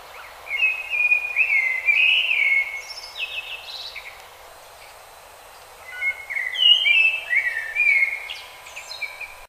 blackbird at dawn, some stretnoise in background. Near the middle the bird sounds as mimicking the noise of police radios, but who knows. / mirlo al amanecer, un poco de ruido de ciudad en el fondo. Hacia la mitad da la sensacion de que el tordo imita ese ruidillo tan tipico de las radios de la policia. Quien sabe.